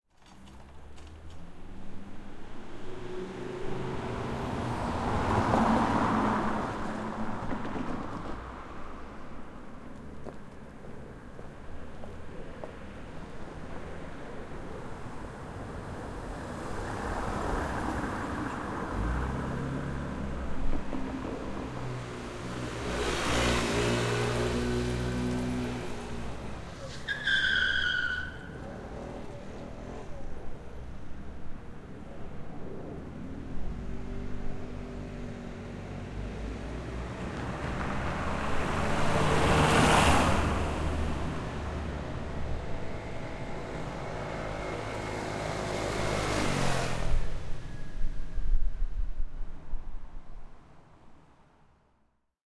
Nightly Dutch Traffic with Tire Squeaking 2
Recording of a traffic crossing in the Dutch town Hilversum. Recorded with Rode NT4 Stereo (XY) Mic and the Zoom H4 recorder.
vehicles, stereo, driving, town, recording, place, car, ambience, nightly, engine, city, moped, village, crossing, traffic